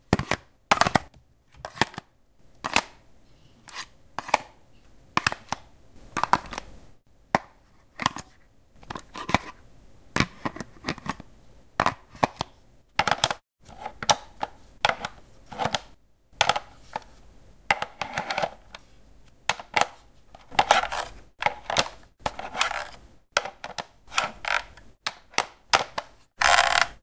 Telephone Handling
Sounds of handling a plastic stationary telephone. Zoom H2 recording, close distance, windshield, low gain.